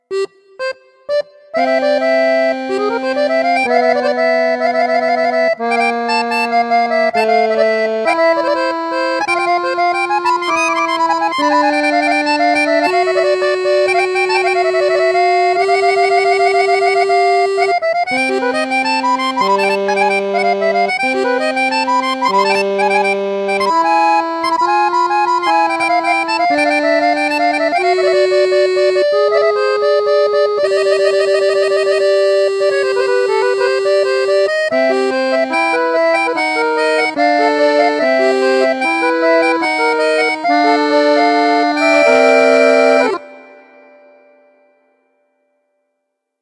Serbian accordion Improvisation
Me playing improvisation authentic for Balkan music.